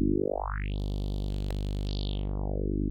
79912 WAH-tooth OrganHF
hit, instrument, note, organ, syth, tone, wah